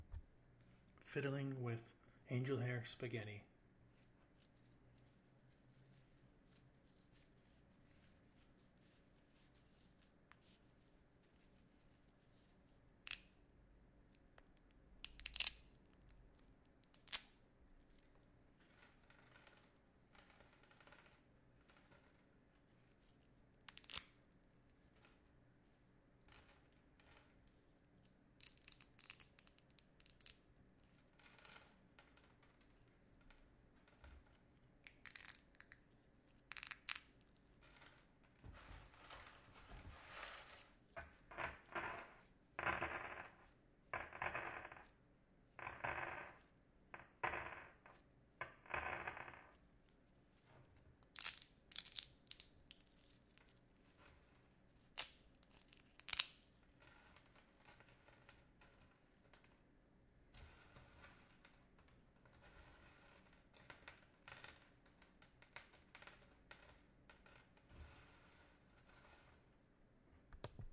FOLEY Fiddling with angel hair spaghetti
What It Is:
Fiddling with angel hair spaghetti.
A spider.
spider, bug, field-recording, insect, foley, AudioDramaHub